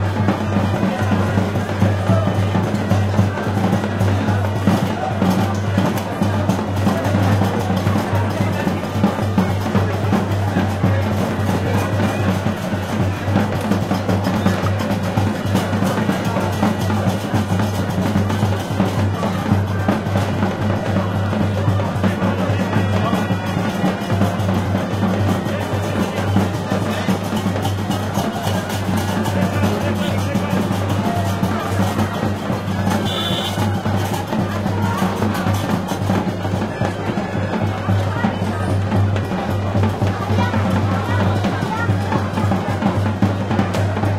Marrakesh Ambient loop
African Music Recorded in Marrakesh. Loopable.
Recorded with a Sony PCM D50